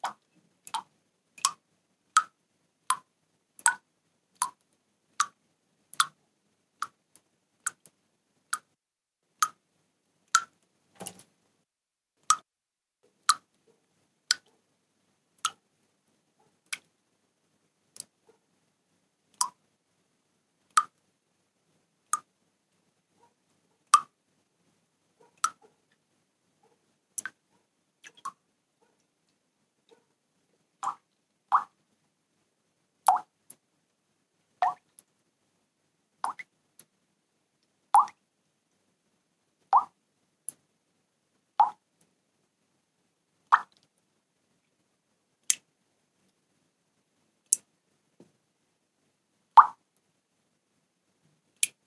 water is dropping to a full glass.
water, bubble, blowholes, drop